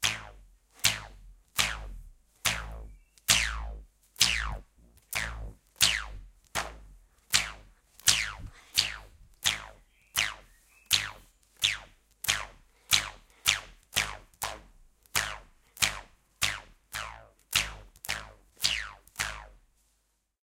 elastic booinnng
Weird sound made with a rubber band
elastic, notes, rubber-band, strange, weird